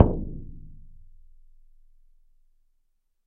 Shaman Hand Frame Drum 18 02
Shaman Hand Frame Drum
Studio Recording
Rode NT1000
AKG C1000s
Clock Audio C 009E-RF Boundary Microphone
Reaper DAW